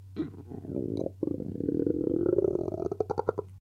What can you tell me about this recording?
stomach growl-PiSh 01
stomach, growl